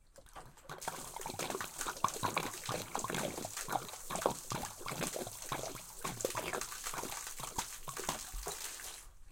Big Liquid Gurgle Pour Splash FF202
Water, gurgle, pouring, water jug, splash, slow pour, loud, glug
Water-jug, Splash, Slow-pour, Water, Liquid-pouring